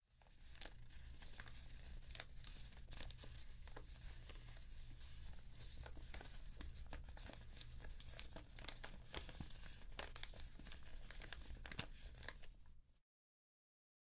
Papel quemandose
burning paper sound